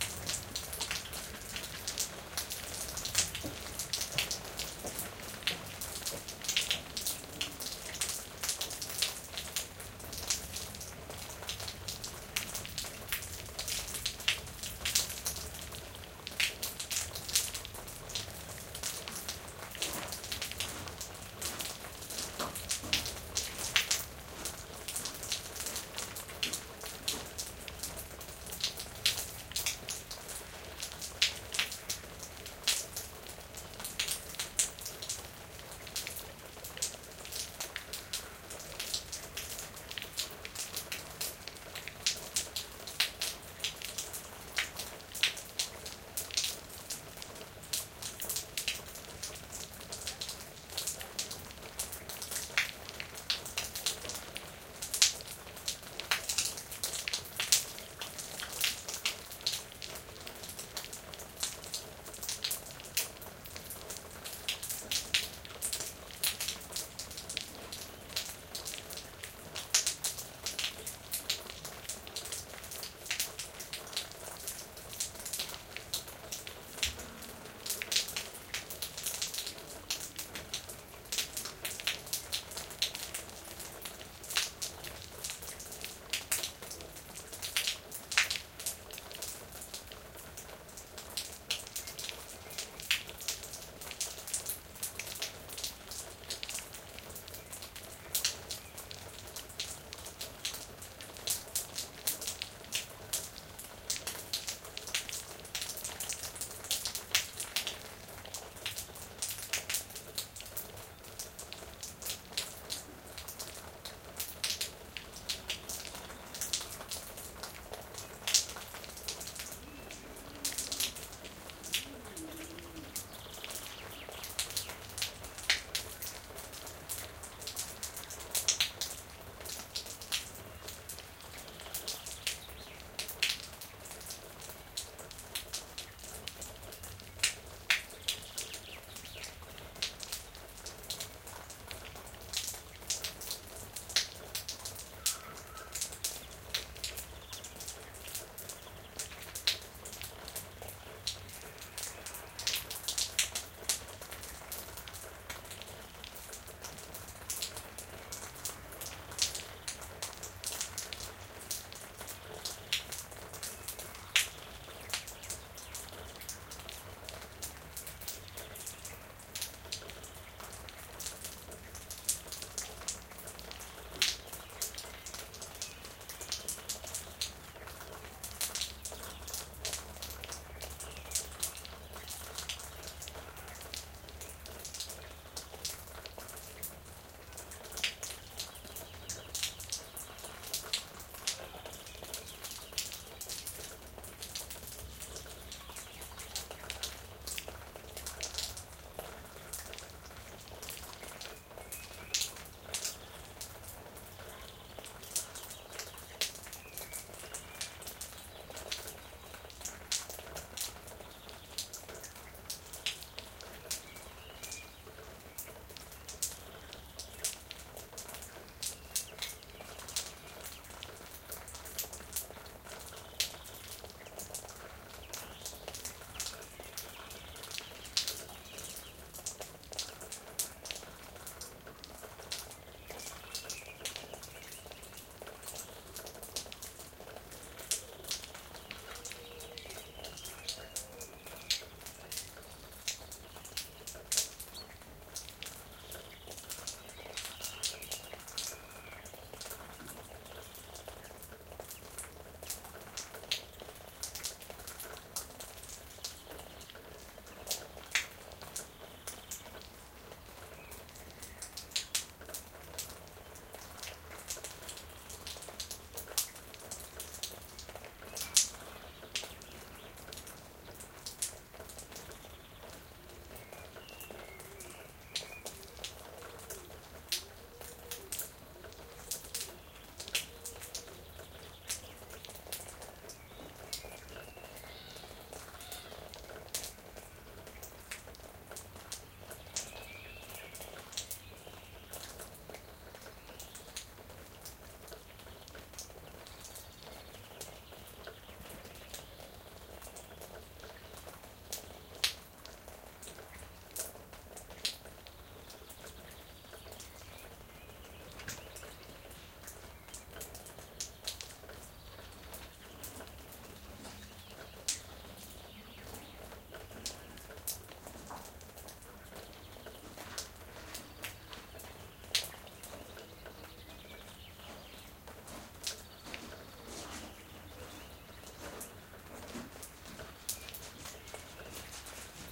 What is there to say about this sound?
20100421.soft.rain
soft rain falling and splashing. From minute 3 onwards birds can also be heard singing in background. Sennheiser MK60 + MKH30 into Shure FP24 preamp, Olympus LS10 recorder. Decoded to mid-side stereo with free Voxengo VST plugin